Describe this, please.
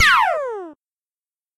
Shoot sound made with Audacity.
shot, gun, laser, shoot